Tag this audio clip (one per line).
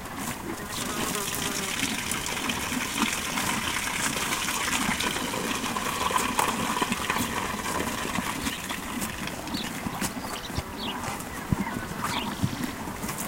piss
nature
water
spring
ambiance
horse
birds
field-recording